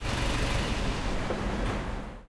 Turning off engine
Sound of a car motor being turned off in big car park (noisy and reverberant ambience).
UPF-CS13, campus-upf, comercial, engine, glories, mall, parking, shopping